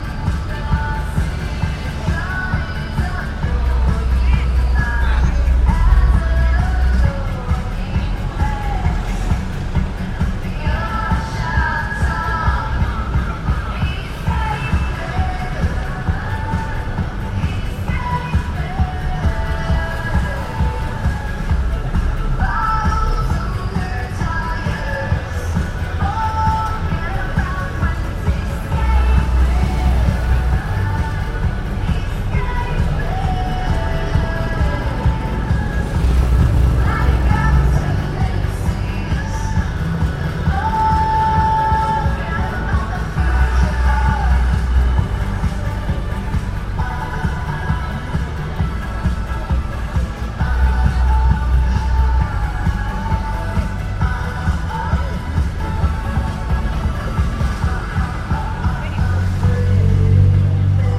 The ambiance recorded in Siam Square, Bangkok, Thailand where teenagers go shopping. You can hear music and commercials from a large LCD TV near the center point of Siam Square.
Recorded with a cheap omni-directional condenser microphone.
crowd, shopping